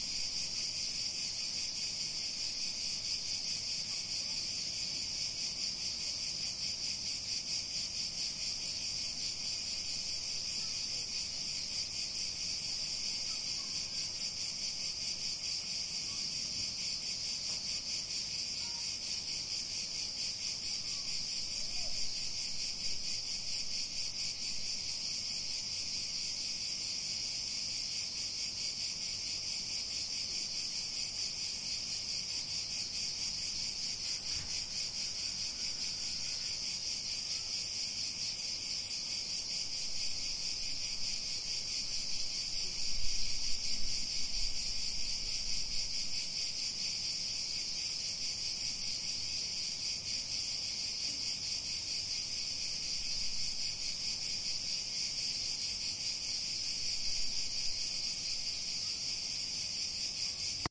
Recorded July 2020 at Loutraki Greece with a Tascam DR-100 MK3
summer, field-recording, insects, nature, cicadas